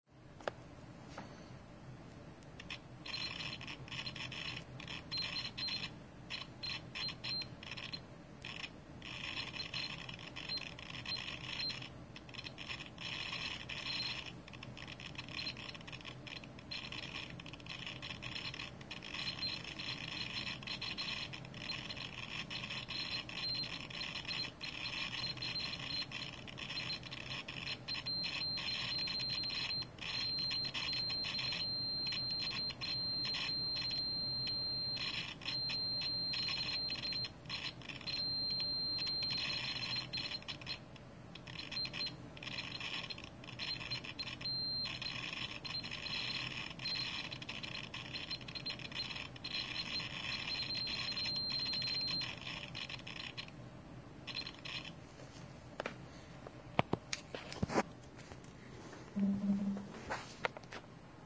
Geiger (simulation) (Dosimeter)
This sound is made by my old continuity meter when the contacts are moved around. The Clicking sounds similar to that of a more modern geiger counter or dosimeter. I have heard a few over the internet and some of them produce a beeping noise when the max range of radiation level is reached. Thank you.
beep, beeping, click, clicking, counter, digital, dosimeter, geiger, geigercounter, radioactivity